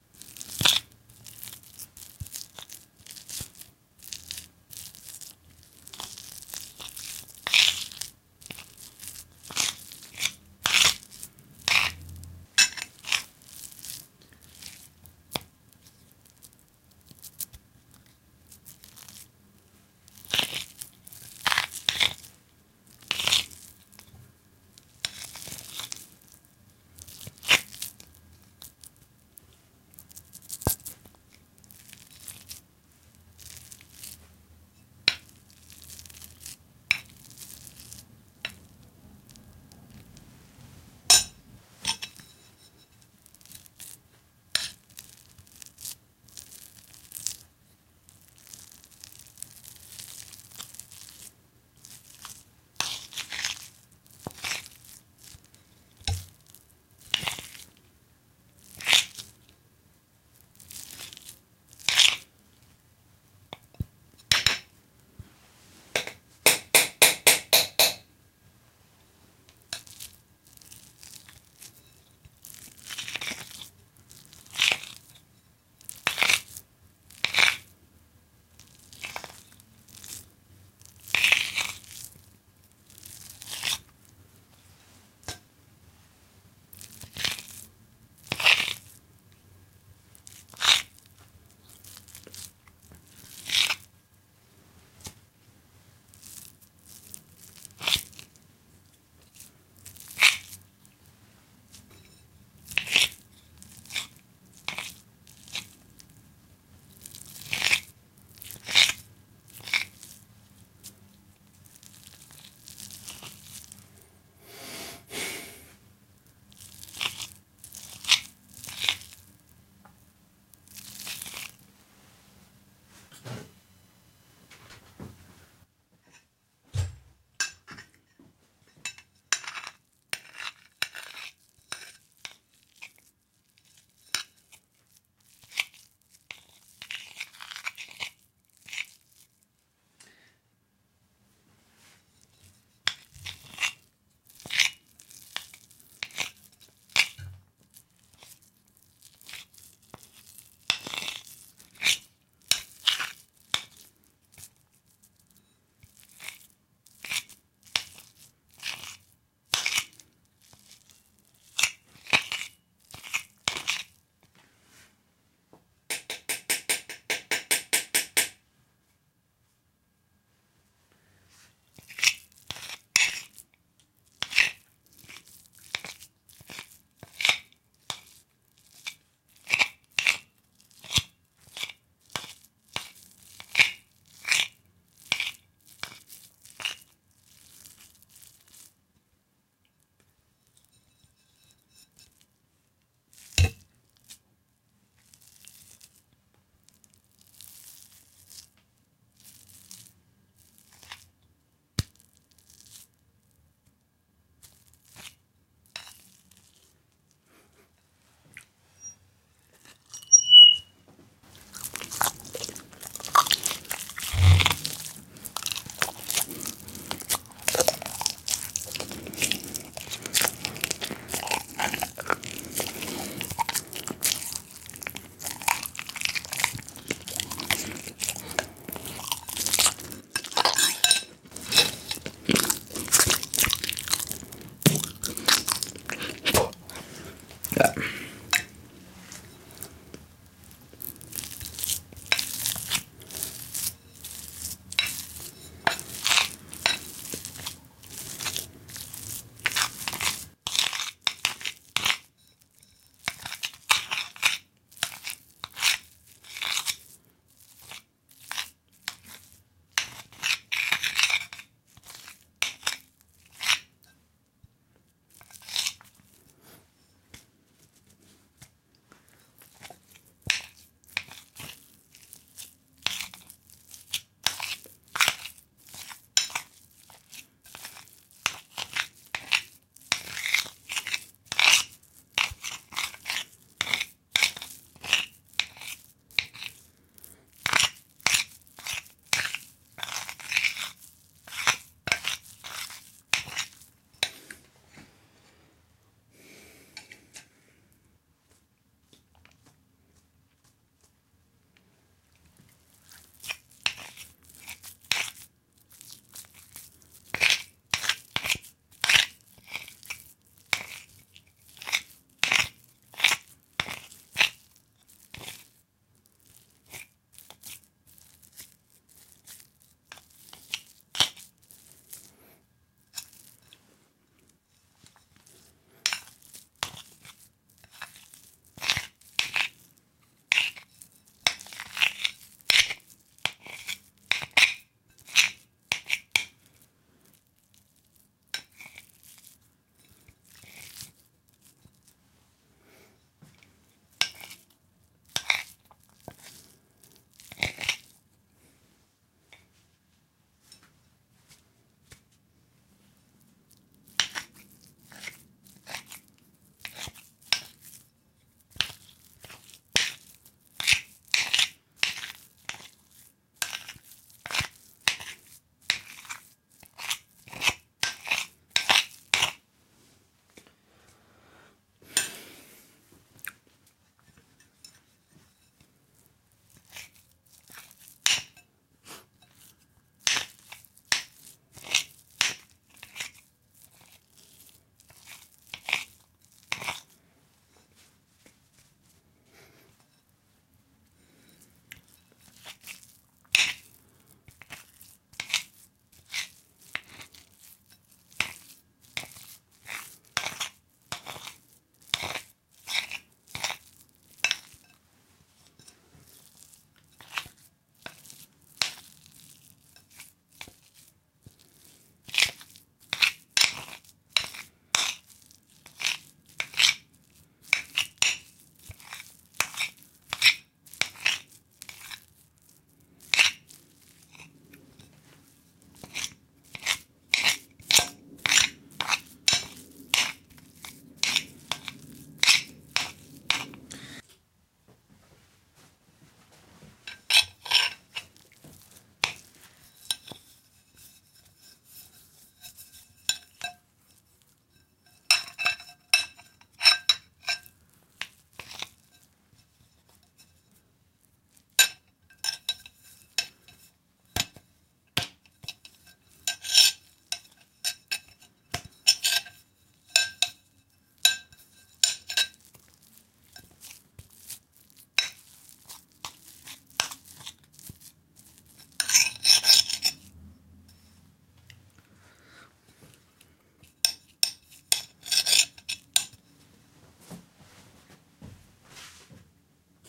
GRabación de un plato, luego plato roto, y plato con puré. Recording a plate, then broken plate and bowl and mash.